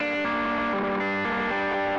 Randomly played, spliced and quantized guitar track.
distortion, guitar, overdrive, gtr, 120bpm, buzz, loop